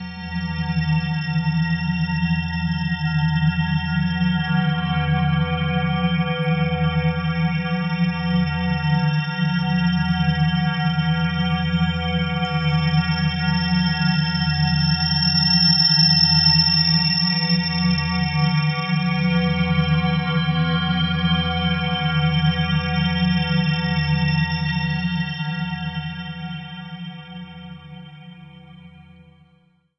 THE REAL VIRUS 06 - BELL DRONE - E3
Drone bell sound. Ambient landscape. All done on my Virus TI. Sequencing done within Cubase 5, audio editing within Wavelab 6.
ambient, bell, drone, multisample